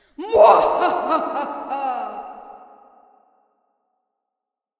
evil laugh 6
Evil laughter recorded for a production of Sideways Stories from Wayside School. Reverb added.